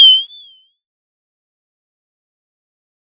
Could be used for when someone winks.
Alternatively...
The noise when there's a diamond in a pile of mud, you clean it, but even though the diamond is shiny, it's still a bit rough.